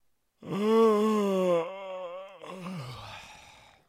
Fake yawn male
Just me fake yawning
Used it in my cartoon Gifleman